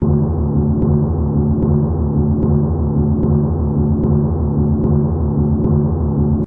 Electronic Pounding Sound mono
A pile driver like sound mid-low frequency. Could be an electronic heartbeat or a bad headache.